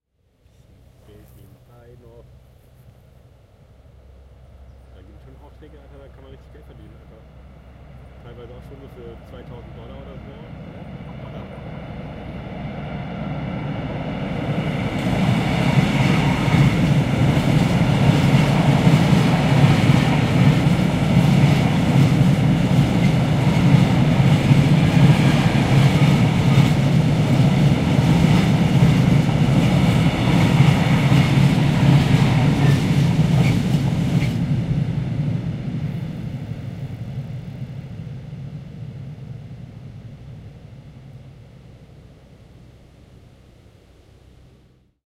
train passing High Quality Surround
freighttrain passes by recorded with H2n sourround M/S and XY layered.